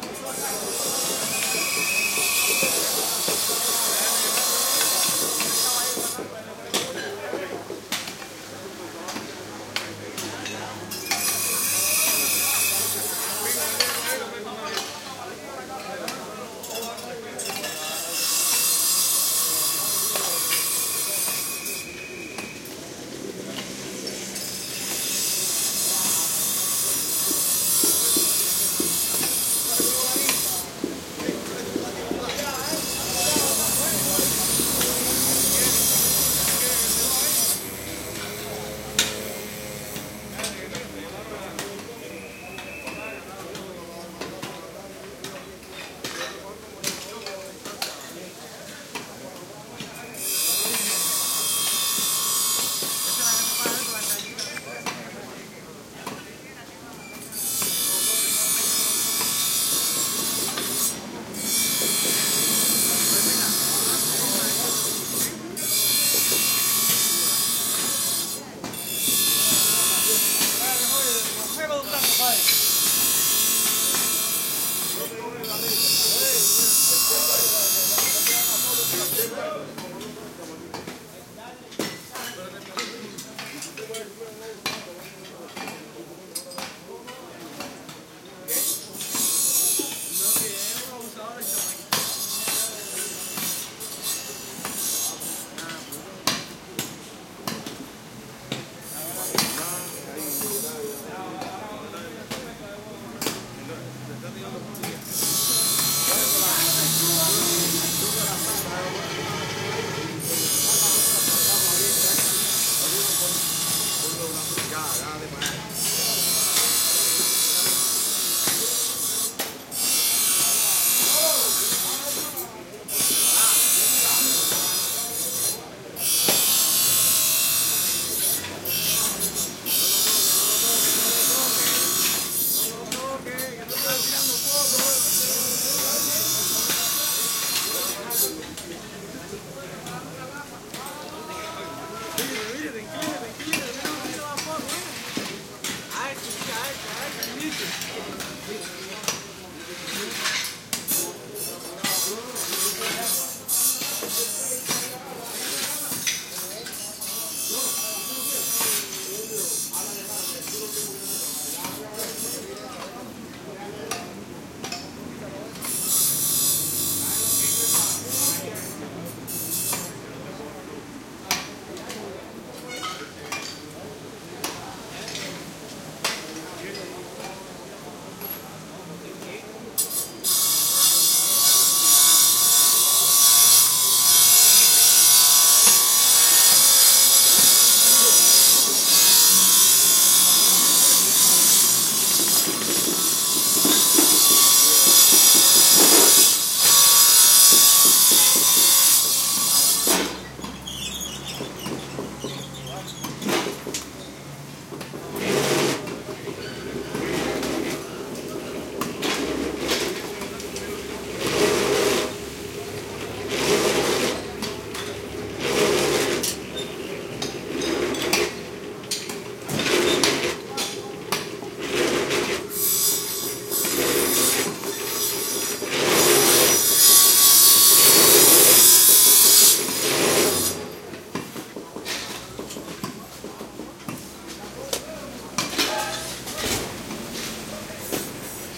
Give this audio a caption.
workers cuban +bandsaw
Group of cuban men working on a small new building beside other buildings. bandsaw cutting stuff often heard over the chatter, chipping sounds, and light, offmic traffic.
bandsaw, construction, crowd, cuba, walla, workers